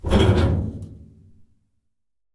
Moving a large metal object. Can't remember what it was, think it was an oil drum.